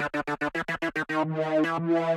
vintage synth 01-01-02 110 bpm
some loop with a vintage synth
loop, vintage